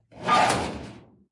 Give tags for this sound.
bell
blacksmith
clang
factory
hammer
hit
impact
industrial
industry
iron
lock
metal
metallic
nails
percussion
pipe
rod
rumble
scrape
shield
shiny
steel
ting

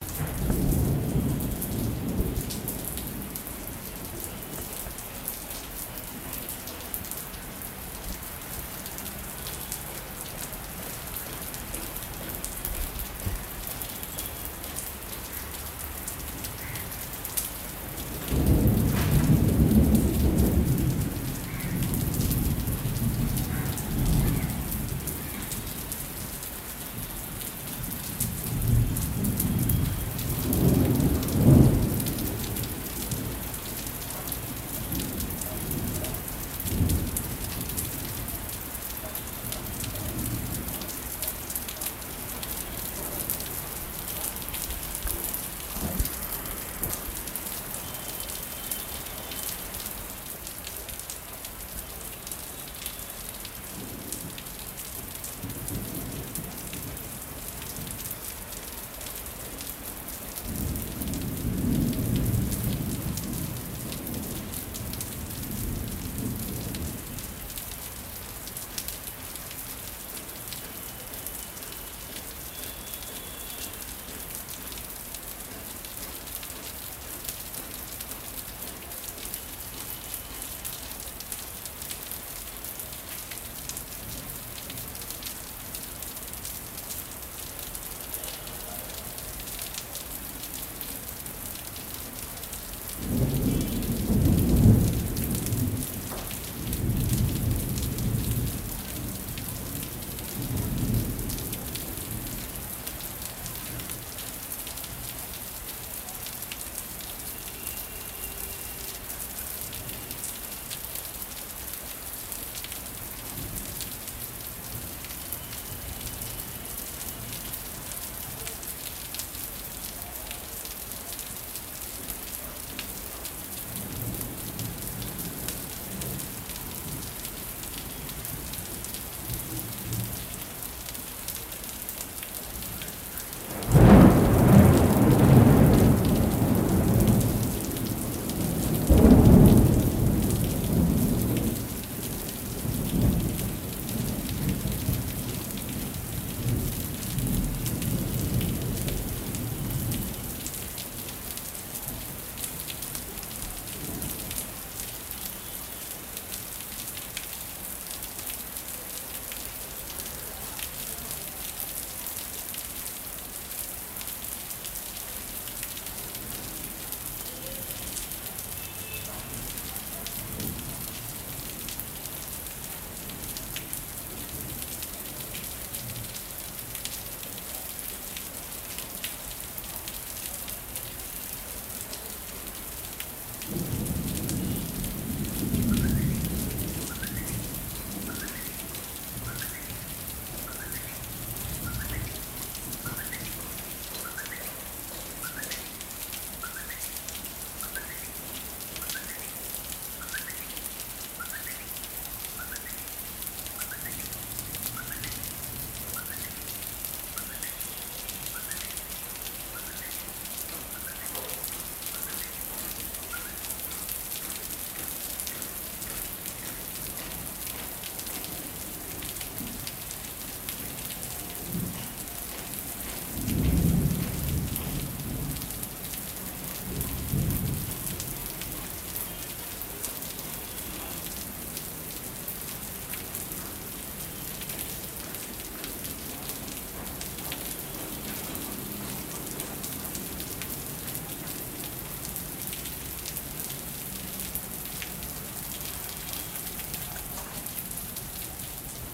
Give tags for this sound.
field-recording
rain
rolling-thunder
storm
thunder
thunder-storm
thunderstorm
weather
wind

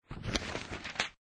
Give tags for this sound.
OFFICE
FX
SOUND
home
recording

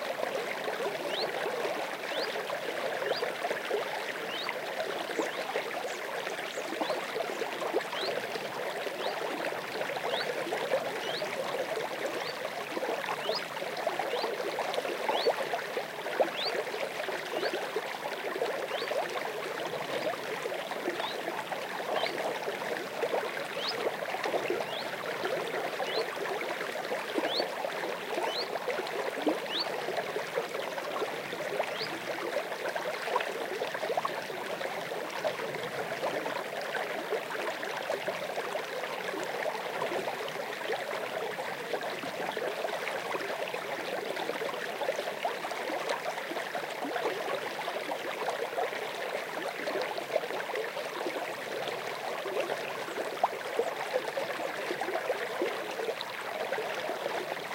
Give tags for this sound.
field-recording
south-spain
spring
water

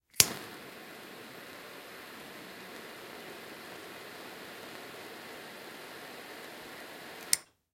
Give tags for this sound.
burn
burner
burning
candle
cigarette
fire
flame
flames
gas
ignite
ignition
jet
light
lighter
lighting
match
nozzle
smoke
smoking
spark
stove
zippo

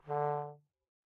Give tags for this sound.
d2
midi-note-38
multisample
oldtrombone
short
single-note
vsco-2

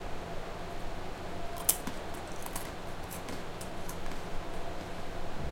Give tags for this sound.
pretzel
eat
crunch

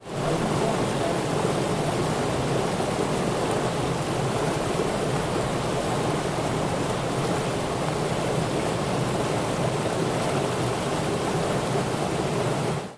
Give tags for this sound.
field-recording
flow
nature
river
stream
water
waterfall

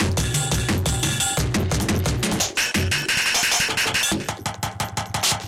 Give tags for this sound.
acid
breakbeat
drumloops
drums
electro
electronica
experimental
extreme
glitch
hardcore
idm
processed
rythms
sliced

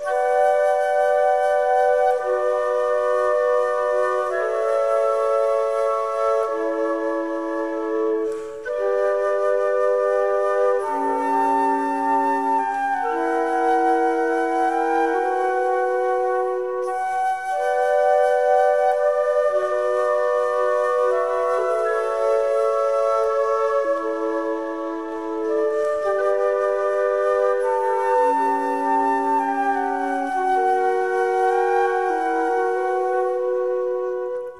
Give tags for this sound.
traversiere
flute

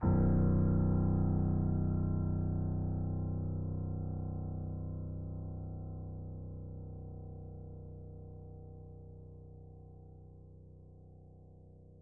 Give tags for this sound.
Ambience
Horror
Note

